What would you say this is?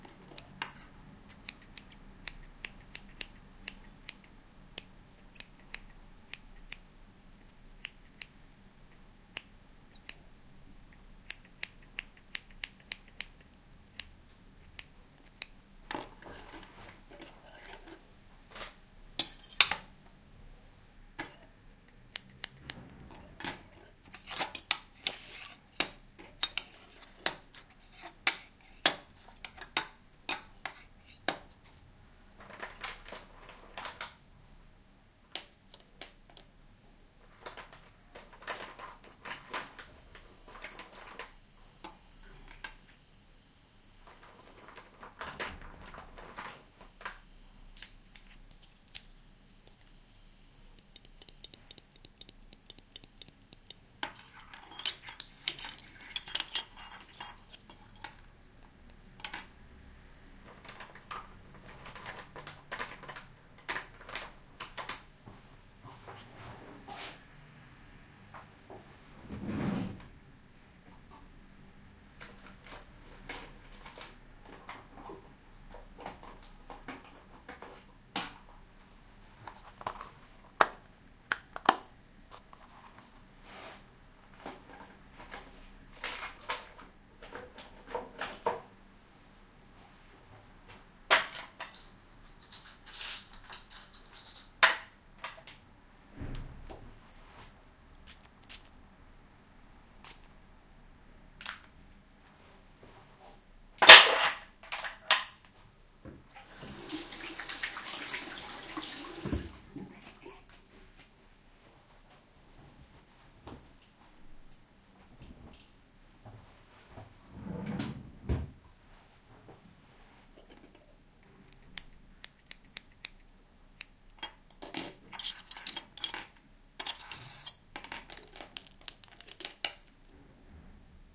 Sound of different first aid stuff